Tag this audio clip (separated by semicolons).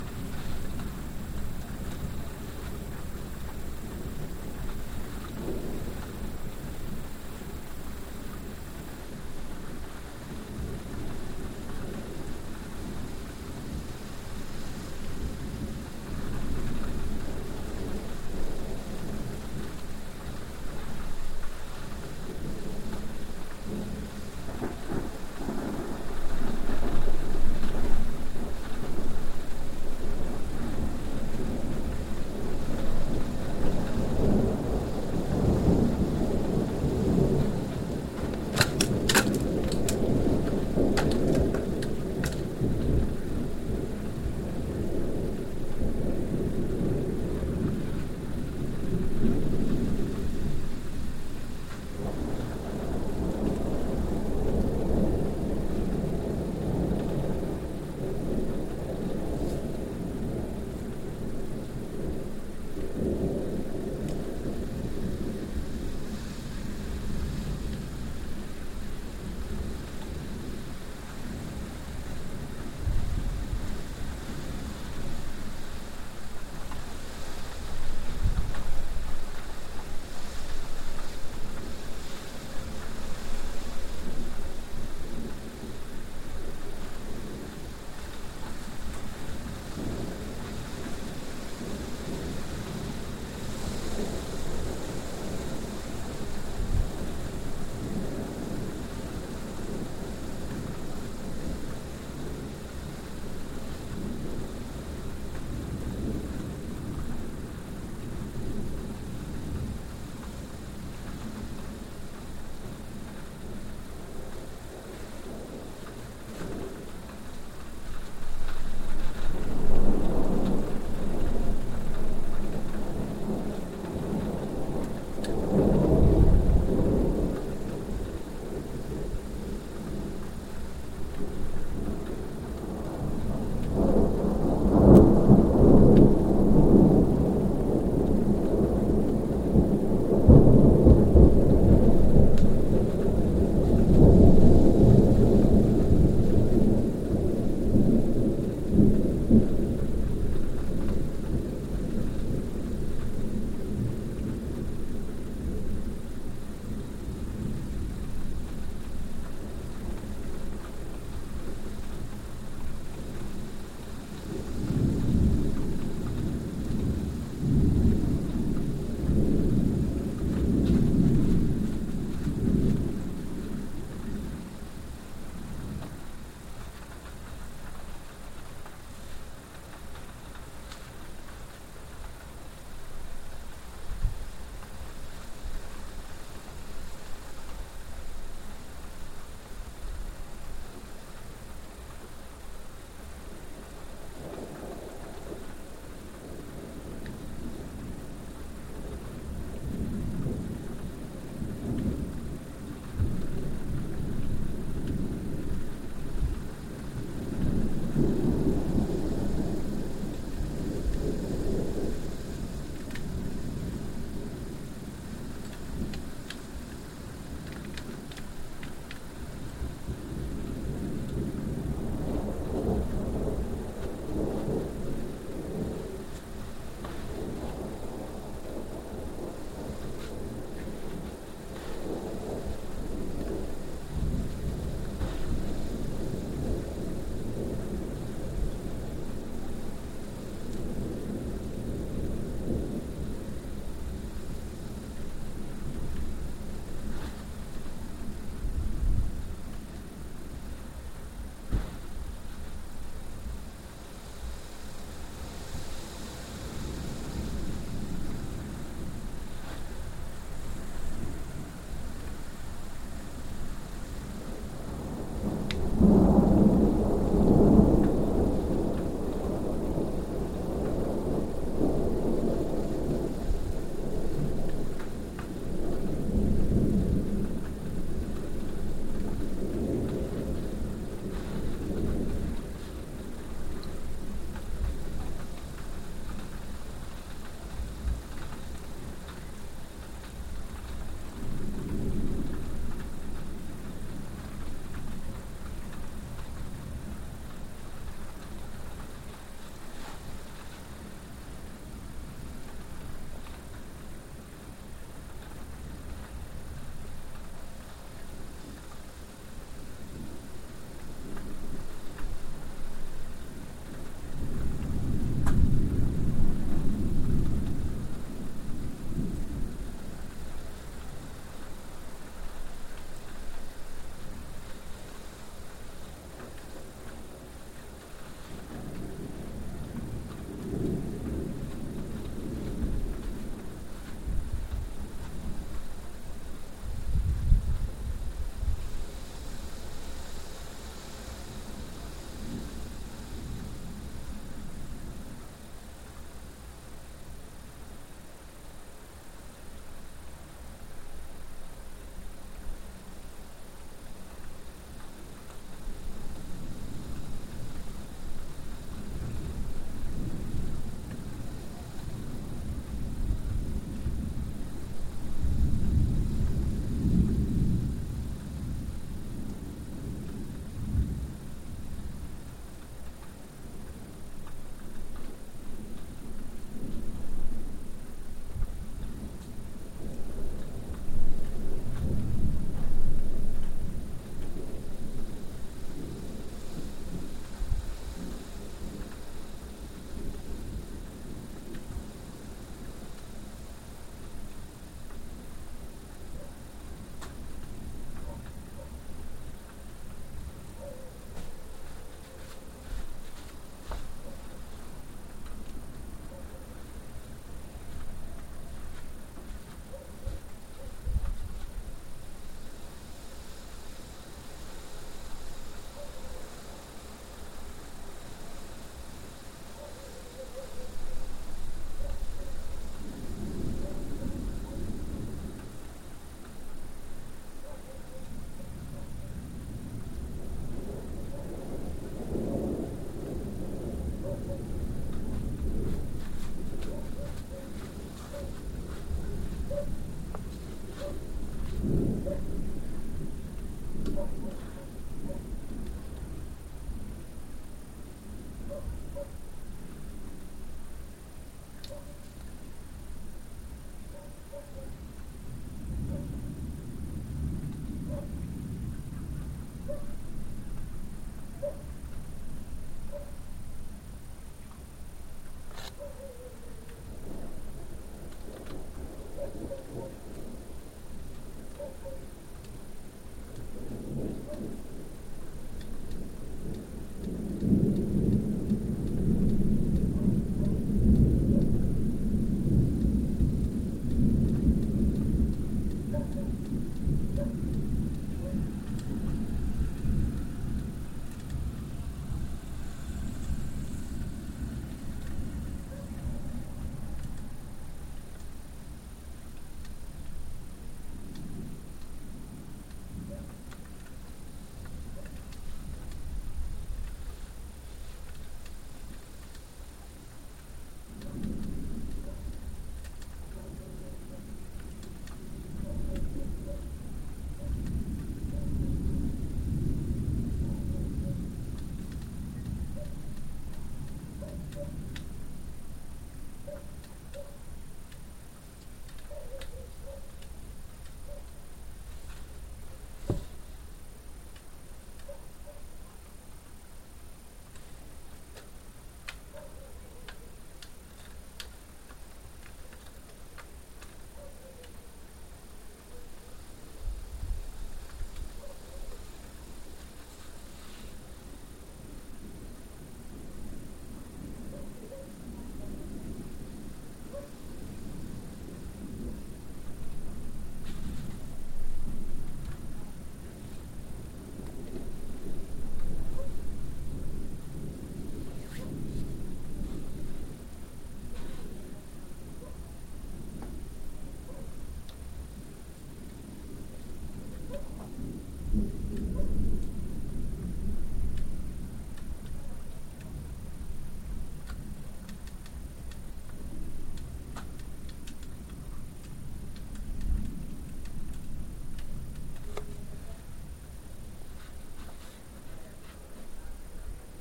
frightening; hardcore; lightning; nature; purist; rain; rainstorm; severe; storm; thunder; thunderstorm; weather; wind; windstorm